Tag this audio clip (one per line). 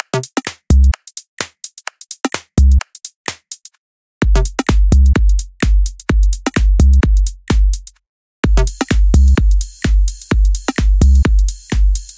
loop
tech-house
bpm
percussion
deep
house
128
beat
sample
tech
minimal